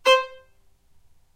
violin spiccato C4
spiccato, violin